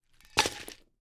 Scattered Pens; in Metal Tin
Metal pen holder being dropped with pens scattering.
drop, dropped, impact, metal, pens, scattered, tin